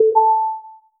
Feedback sound effect for a correct action